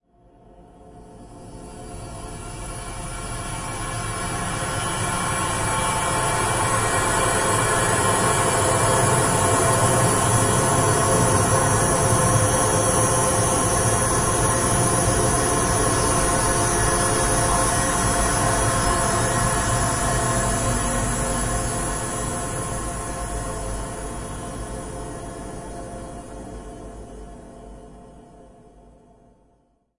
LAYERS 020 - N-Dimensional Space-127
LAYERS 020 - N-Dimensional Space is an extensive multisample packages where all the keys of the keyboard were sampled totalling 128 samples. Also normalisation was applied to each sample. I layered the following: a pad from NI Absynth, a high frequency resonance from NI FM8, a soundscape from NI Kontakt and a synth from Camel Alchemy. All sounds were self created and convoluted in several way (separately and mixed down). The result is a cinematic soundscape from out space. Very suitable for soundtracks or installations.